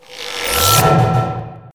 Magic Blade
My One Shot Samples were created using Various input sources and sampled with my Yamaha PSR463 Synthesizer. I try to keep all my one-shot samples 2 seconds or less as the sequencer and drum software performs best with samples this size.
Check out my latest music on the new Traxis Rumble Channel